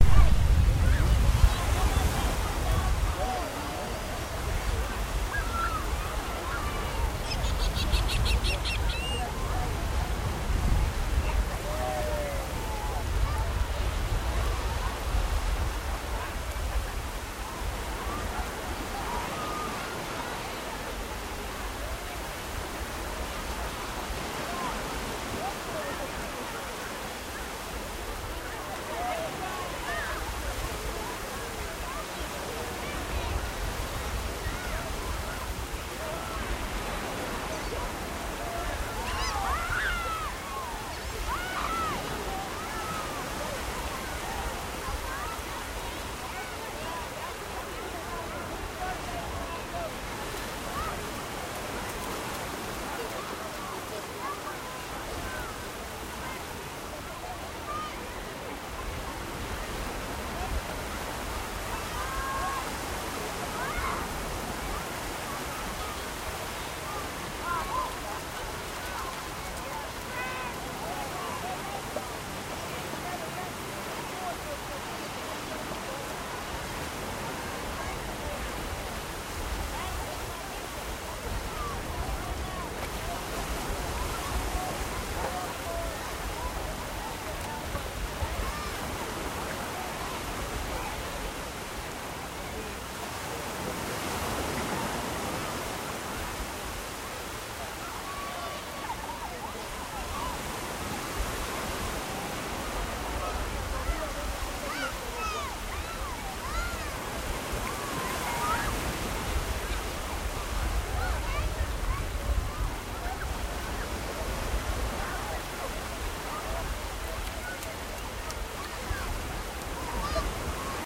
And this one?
Beach goers and surf
Recorded on Clearwater Beach Florida USA. While doing a story for broadcast I thought, the sounds around me might be helpful to someone somewhere. This was June 10, 2013, about 10:30am. Enjoy.
Florida, goers, surf, Clearwater, gulls, beach